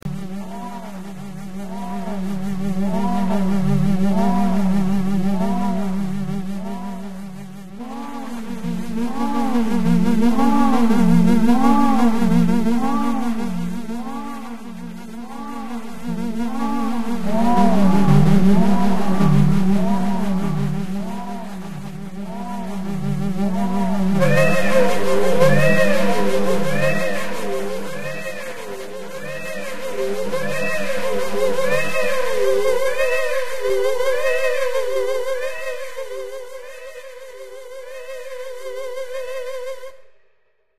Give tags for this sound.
Noise; fictional; machines; weird

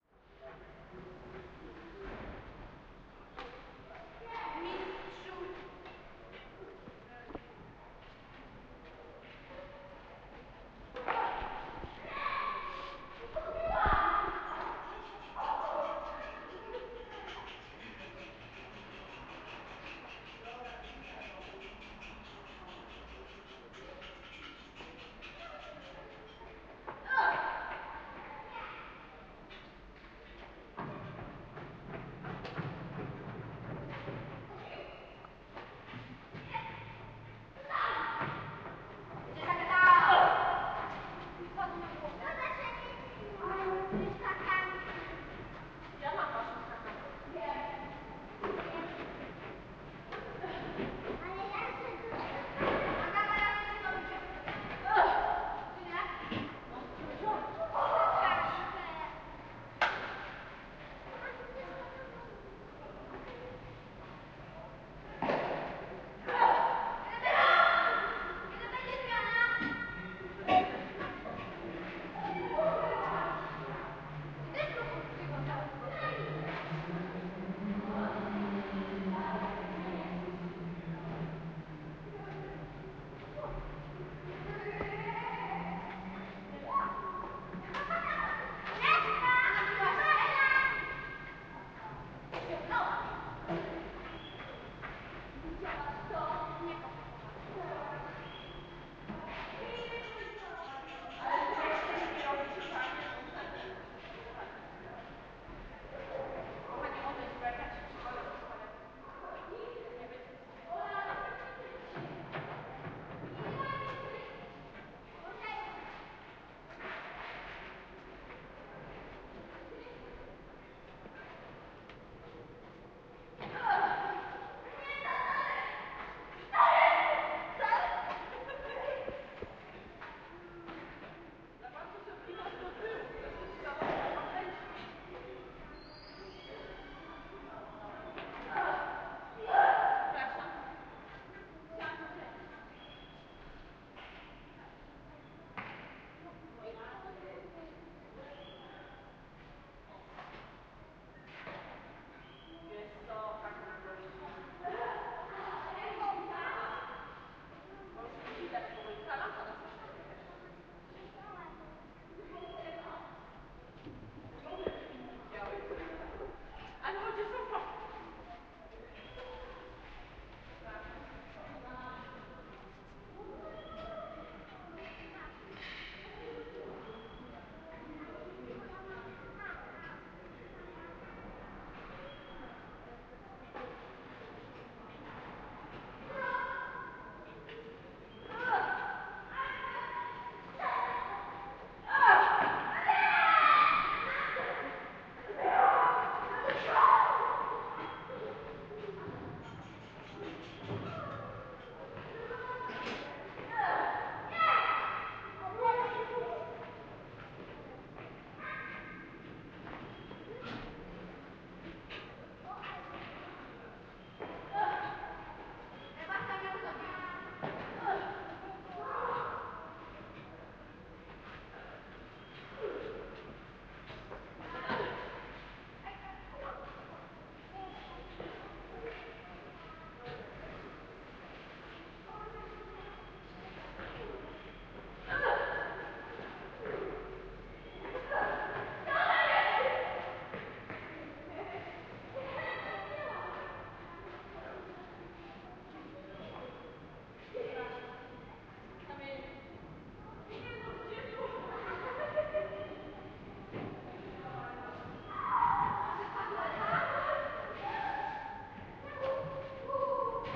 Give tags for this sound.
workers; knocking; hammer; fieldrecording; poznan; noises; courtyard; voices; polnad

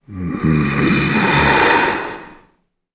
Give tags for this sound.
Action; Fight; Scream; Foley; Male; Battle; Shout; War

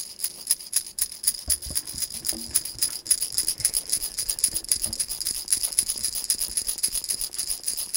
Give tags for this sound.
Mysounds; Pac

